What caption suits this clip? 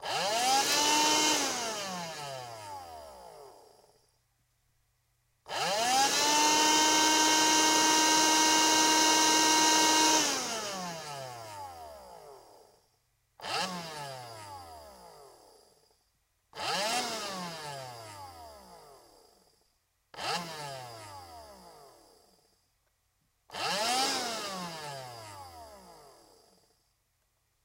Various length of firering up a small vacuumduster